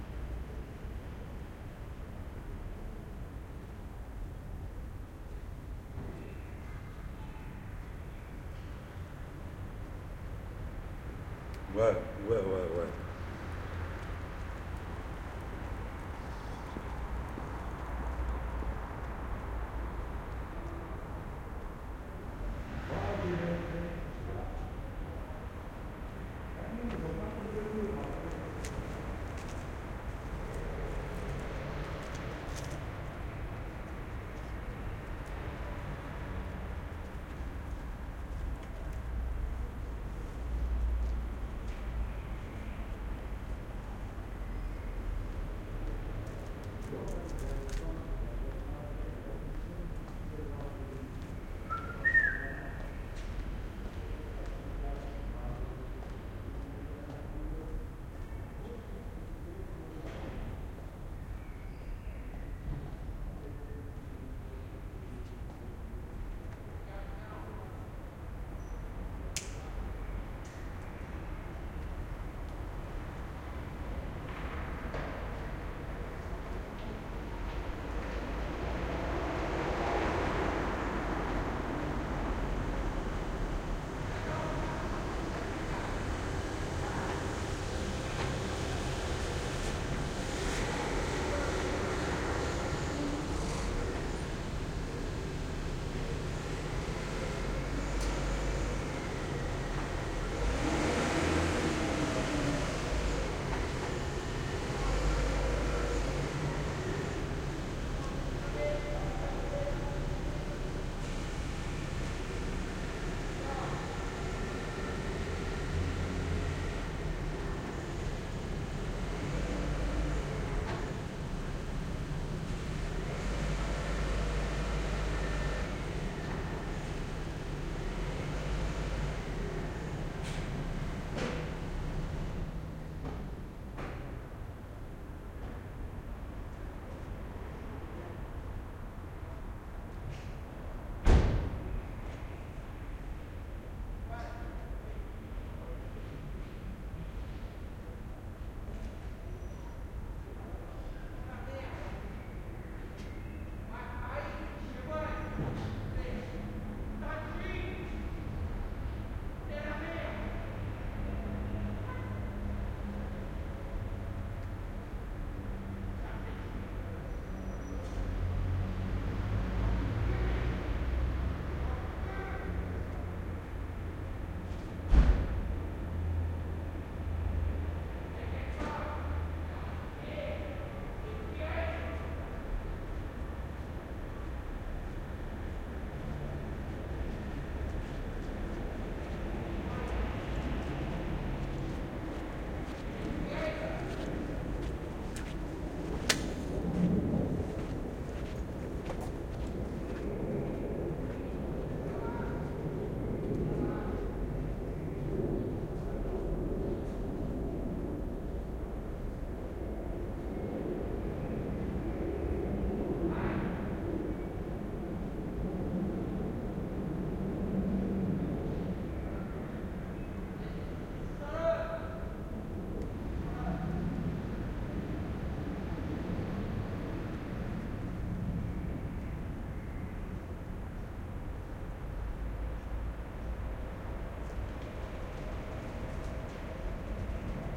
Small Street Calm Distant Traffic Pedestrians Drunk man
Ambiance, Calm, City, Paris, Pedestrians, Street